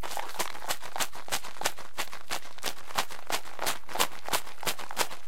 shaker recorded in a bedroom
raw sound, directly from the mixer, no EQs, comp, or FX of any kind
ruela, shaker, room